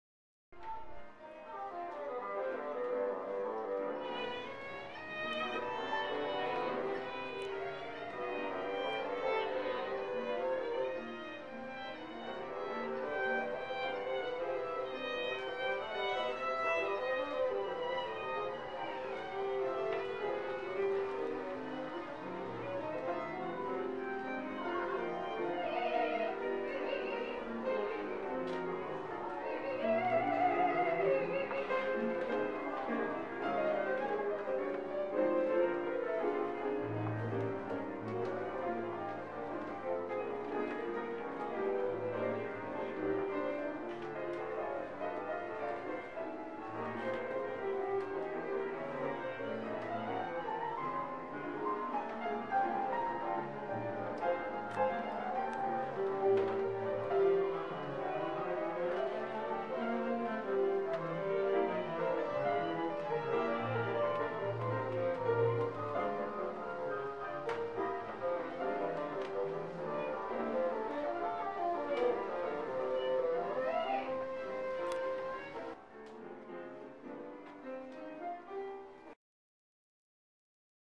JBF Rehearsal Space Edited
space, rehearsal